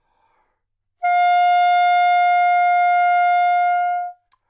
Part of the Good-sounds dataset of monophonic instrumental sounds.
instrument::sax_soprano
note::F
octave::5
midi note::65
good-sounds-id::5593